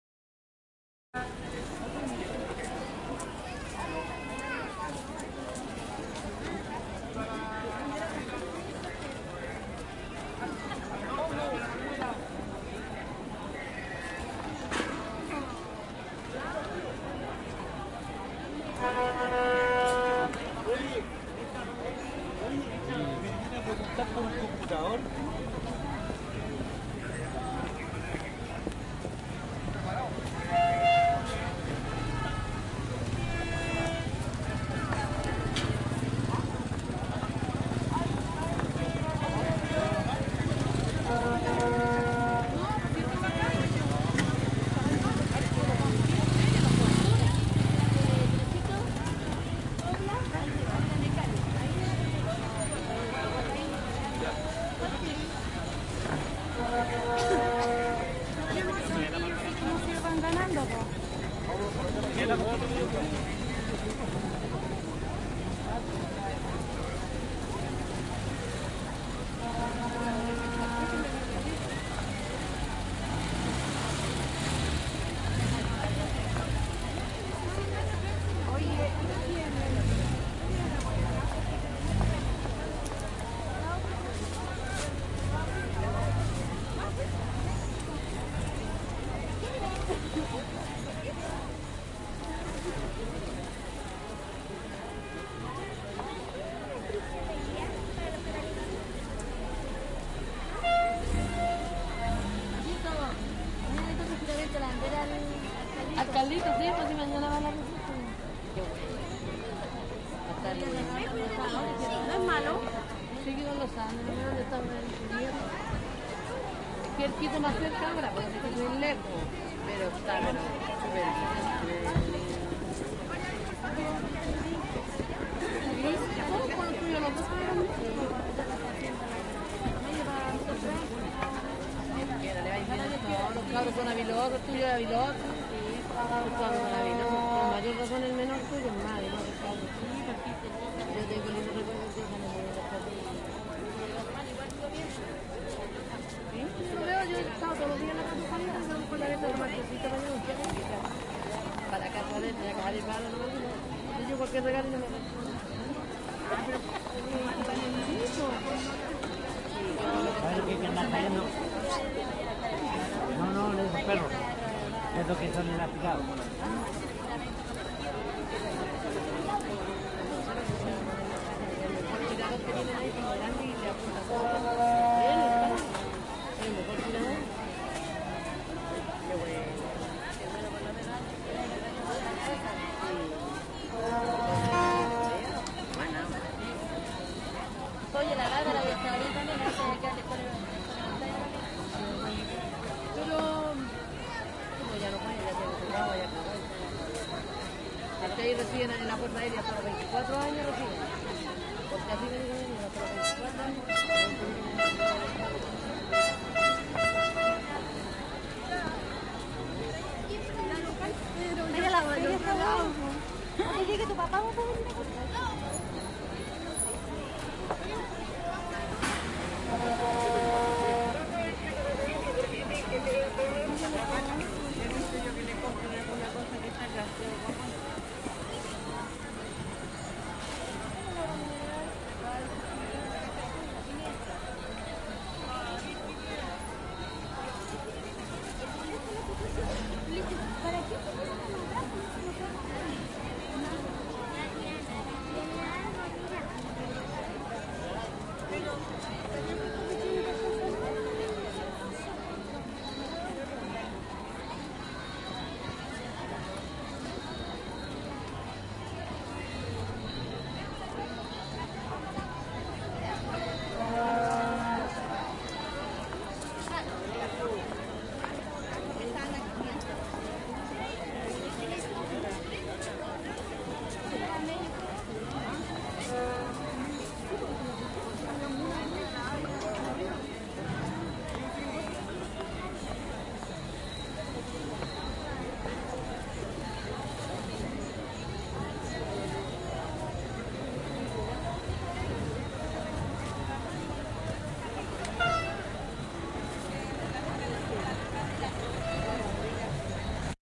meiggs 03 - esquina salvador sanfuentes con meiggs
chile, meiggs, paseo, retail, salvador, trade